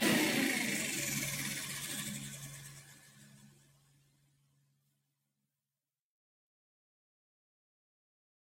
Fan Switching off edited (power down)
one edit of a fan turning off